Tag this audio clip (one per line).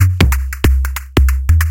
140-bpm
drumloop
electro
loop